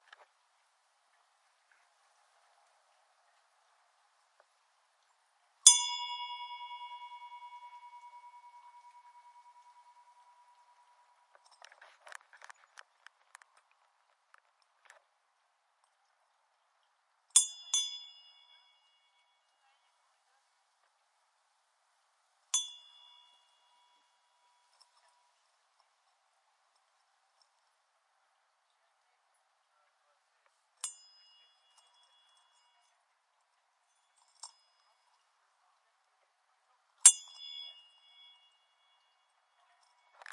Sound of a bright bell